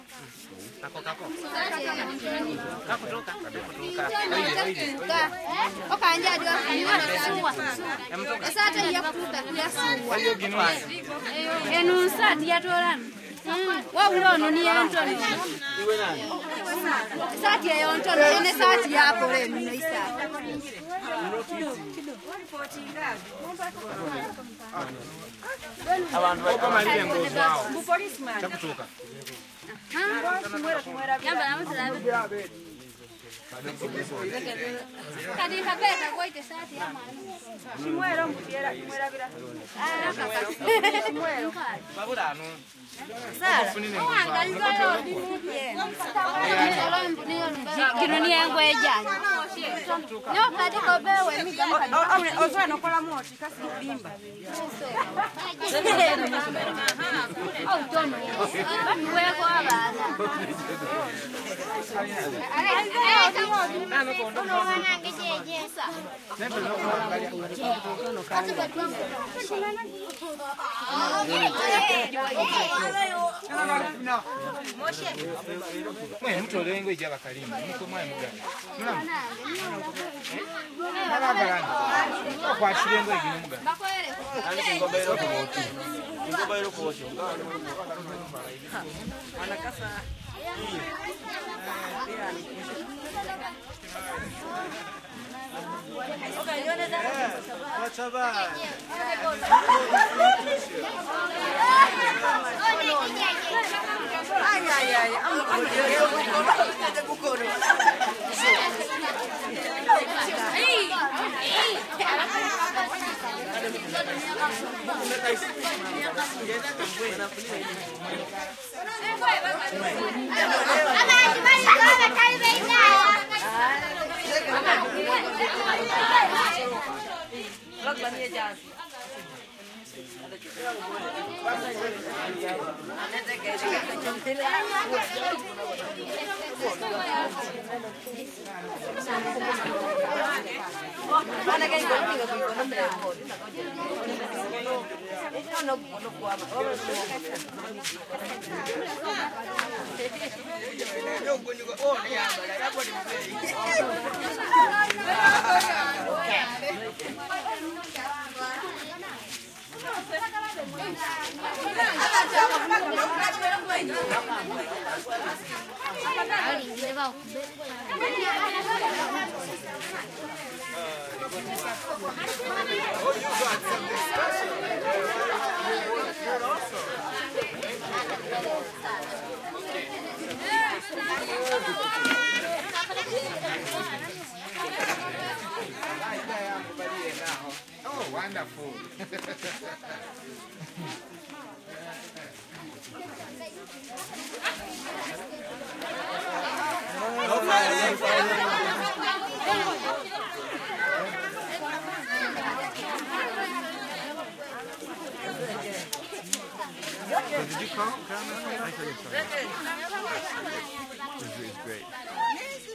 village int ext busy walla voices Uganda
walla, kids, MS, Uganda, voices, int, active, adults, Putti, village, ext, busy